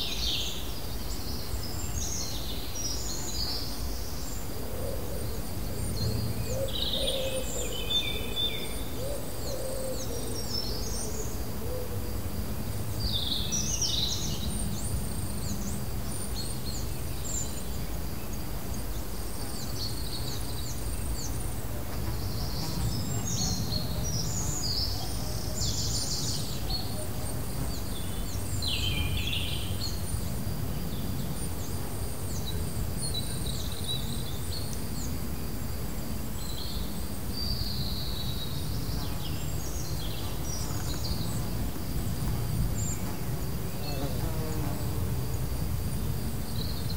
Sound of the mountain, birds and the distant river
Recording the atmosphere in the Galician mountains, near a river and with many birds flying around the area.